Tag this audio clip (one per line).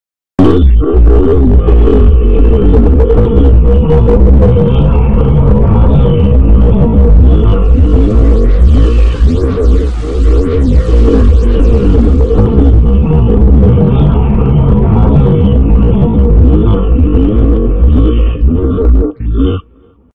earth
quake
cubase-processed
field-recording